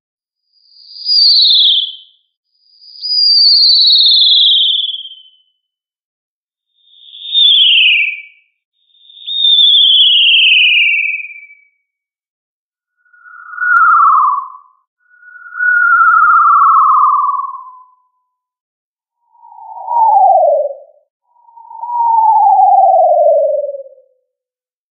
Not intended to be generally useful, this is merely a demonstration of how one can use warbling (slowly frequency-modulated) sine waves that stay within given bands in order to arrive at test tones for hearing that cover a narrow band while still remaining interesting enough to be likely to elicit a response. There are multiple bands used here, with both a shorter tone and a longer tone (850 msec and 2 sec, before adding reverberation forward and backward). This was in reply to a posting in the Sample Requesta forum, Frequency Filtered sound for testing difficult babies and infants hearing in Audiology. After generating these with an analog box circuit I threw together for the purpose, I then used CEP to do FFT filtering to ensure each clip stayed reasonably within bounds of its band, and also added forward and reverse reverb there.